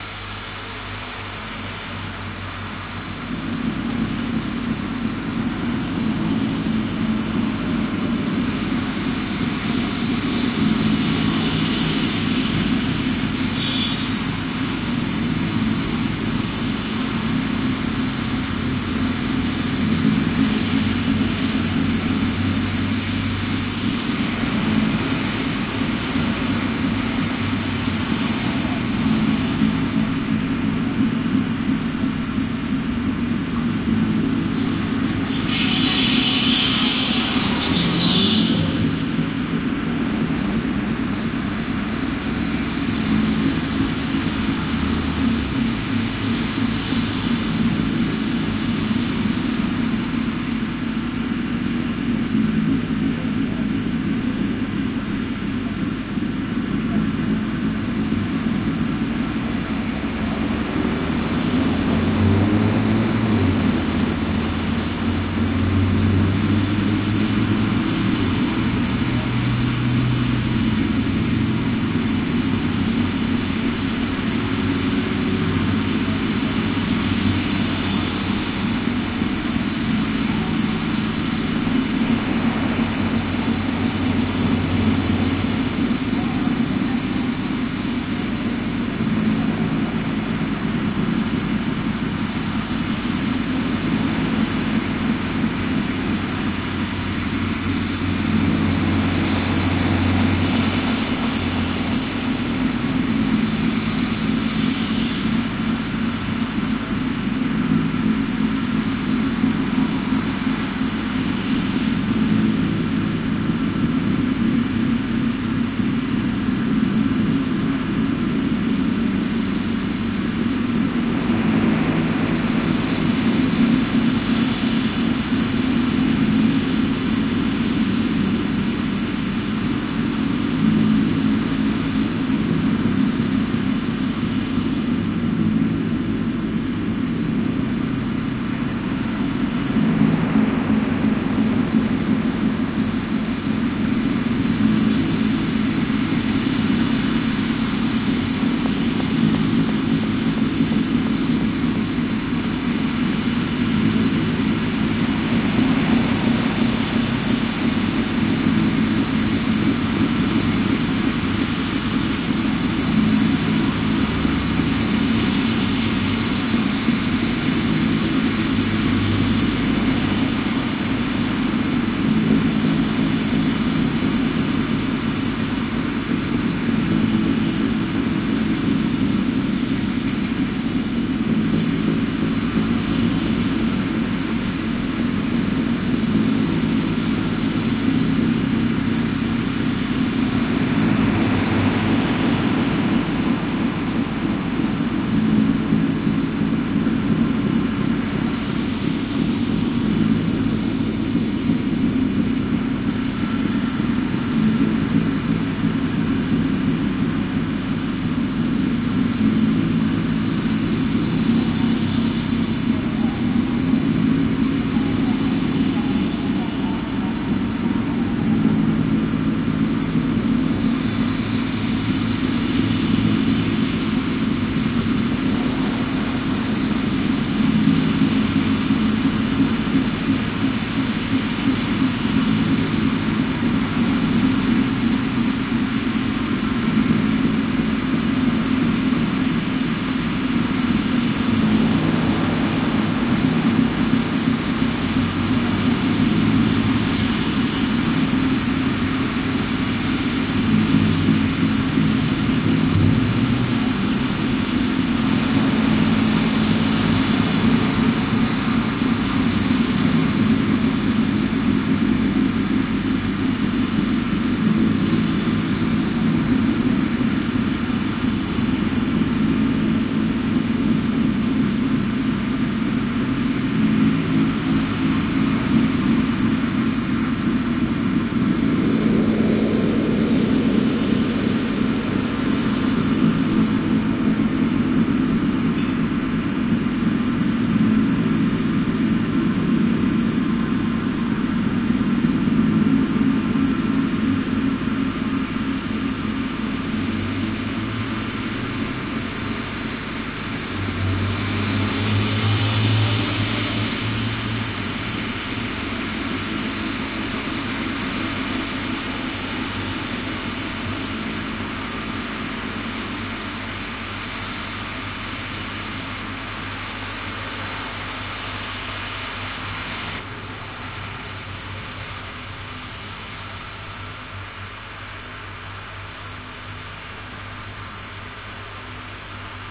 Noise installation @ “Shakhta”
The project takes the form of an amplified live stream of the sound of a motorway.
The purpose is to hear unnatural sound within an existing natural environment,
creating interesting and unusual details of frequencies while watching object (motorway). Tbilisi, Georgia Tascam DR-40x